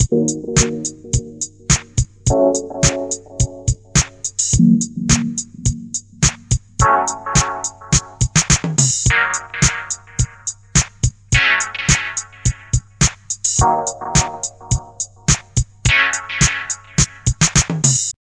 Minor-chord-synth-loop
electro, lofi